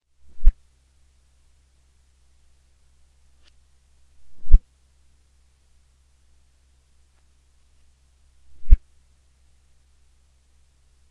swiping a ruler up and down really fast (pitch/ reverse manipulated)